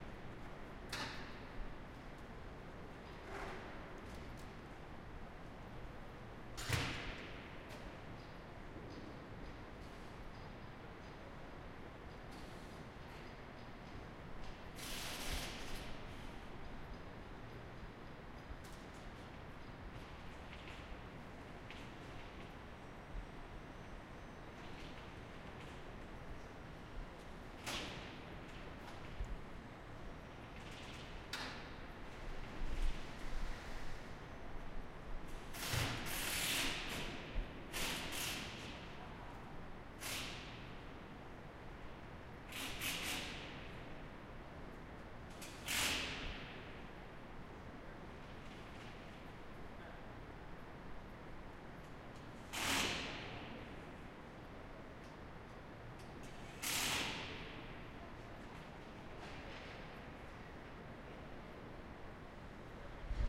Ambience,Hockeyrink,Empty
A large hockey rink, mostly empty